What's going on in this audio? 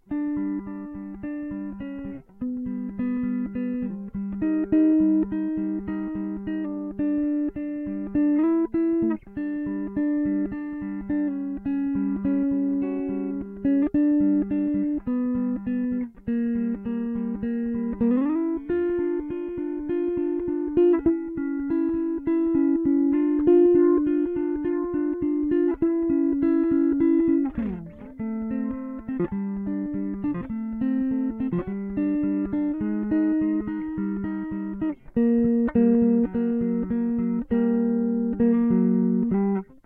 Some melodies being played on acoustic guitar, and recorded by non professional microphone in room
Please check up my commercial portfolio.
Your visits and listens will cheer me up!
Thank you.